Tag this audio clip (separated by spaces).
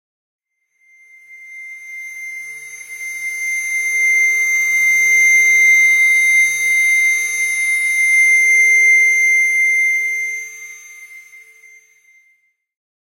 edison high-pitched pad single-hit